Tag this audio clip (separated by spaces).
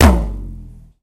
drum drums hit kit mini percussion tom